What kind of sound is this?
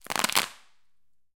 Squeezing a wad of bubble wrap so that several bubbles pop.